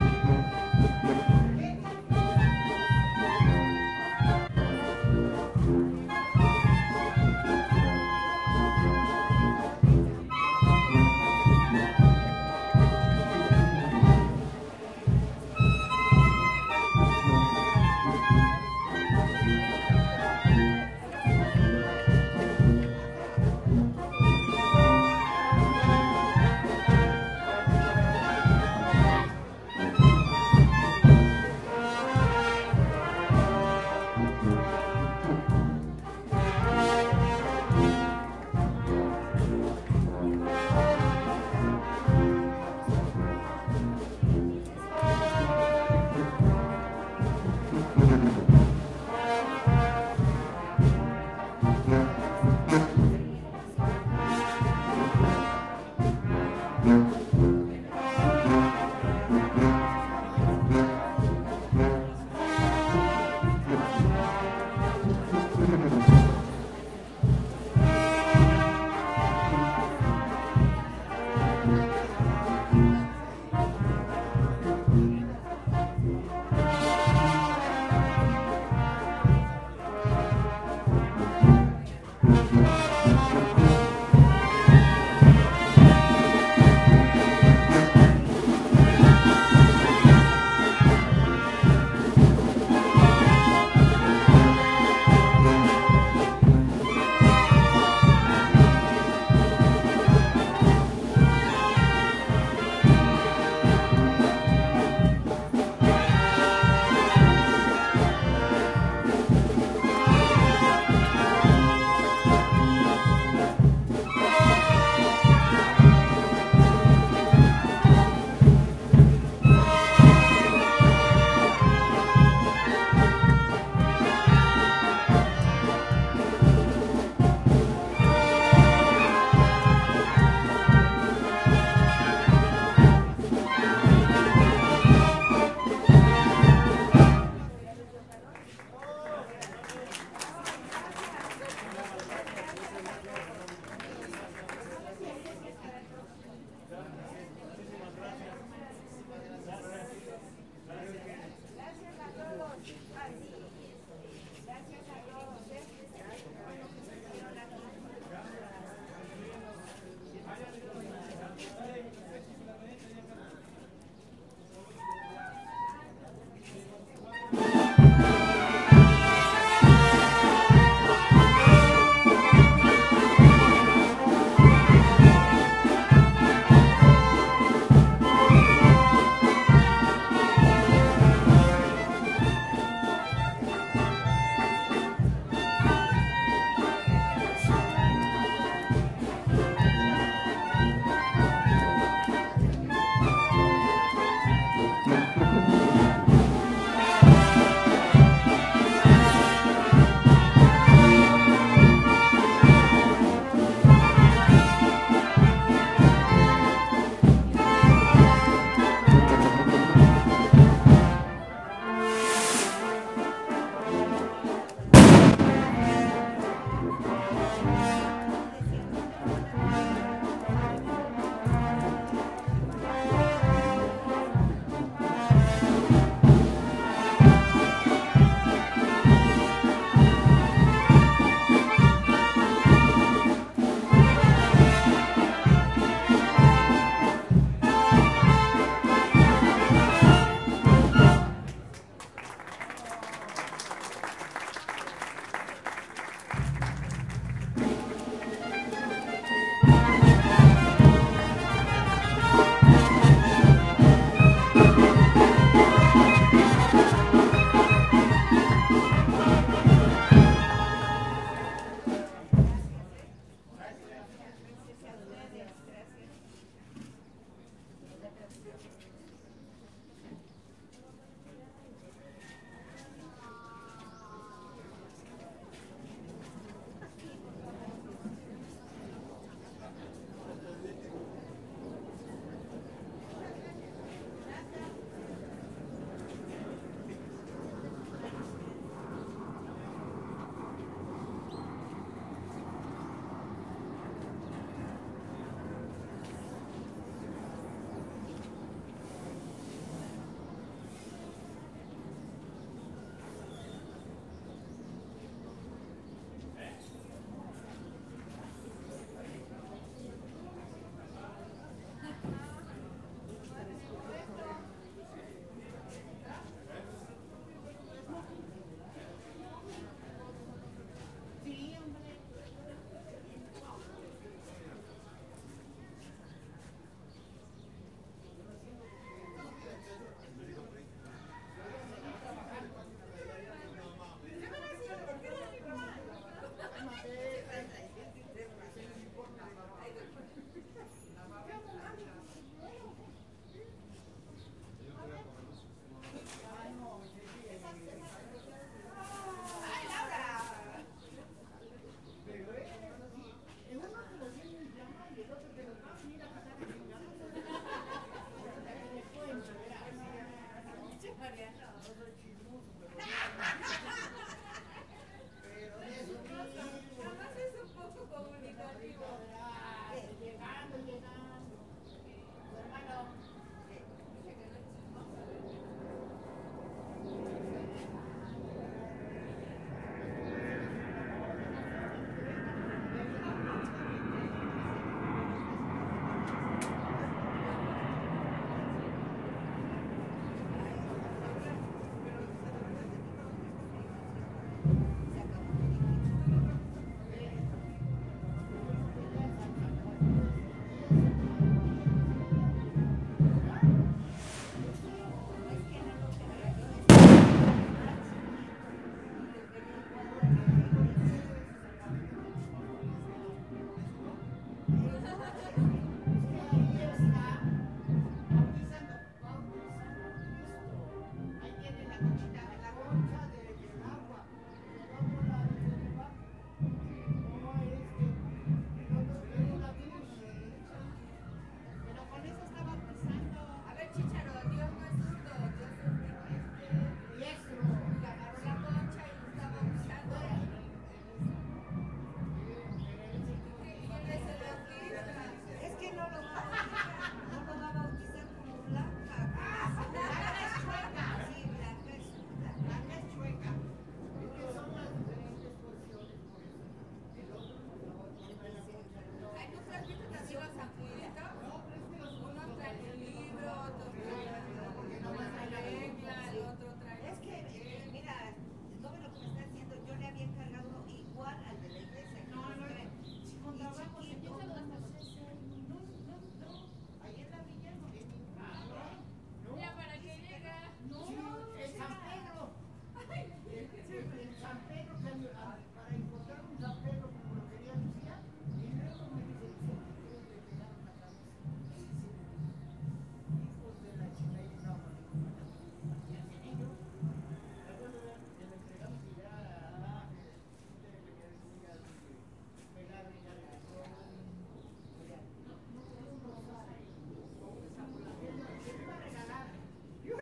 banda de pueblo. band of a town.
banda, fiesta, cohetes, party, band, town